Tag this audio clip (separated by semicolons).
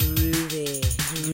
break; breakbeat; dnb; drum; drum-and-bass; drum-loop; drums; jungle; loop